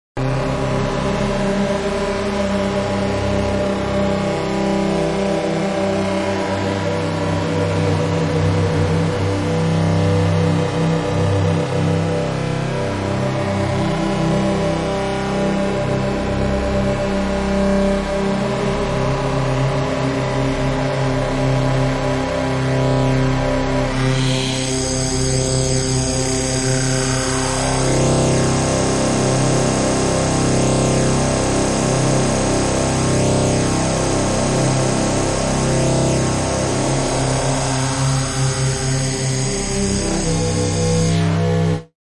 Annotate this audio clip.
Face Smelting Bass Squelchers 2
distorted, shred, rip, heavy, bass, hard, squelch